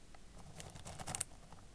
Short potpourris rustling sound made by stirring a bowl of it
crackle, crunch, potpourris, rustle, scrunch